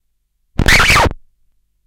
Typical sound of a needle scratching/sliding on a vinyl record.